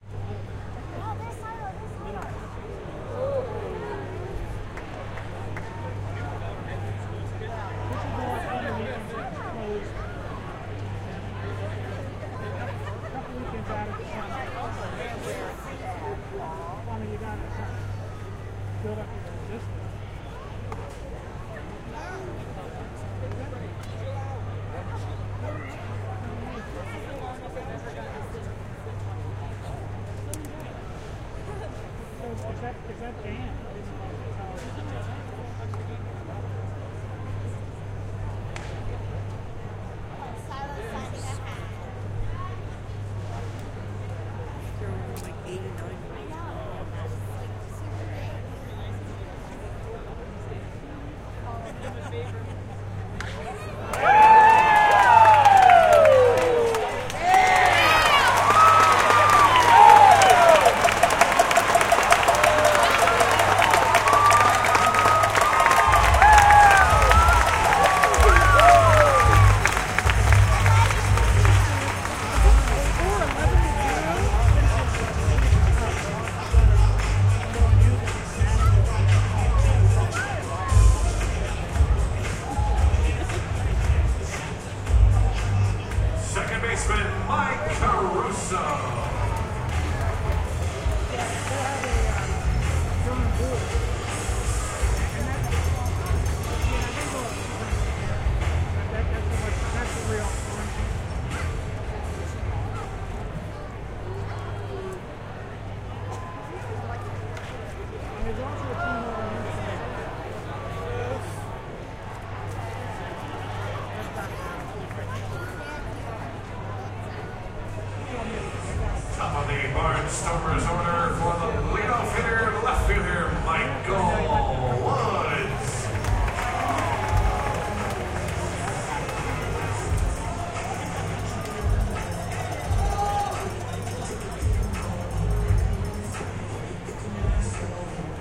Recorded near home plate in a minor-league ballpark. The home team is at bat. There is lots of chatter in the crowd, you can occasionally hear the "smack!" of the pitched ball hitting the catcher's glove, but there is very little audible that can be related to action on the field until about half way through when the batter hits an RBI and the crowd cheers.
This is followed by more crowd chatter, announcements, and the sound of a batter hitting a fly which is caught for an out.
ambient, baseball, crowd, game, hit, league, minor, out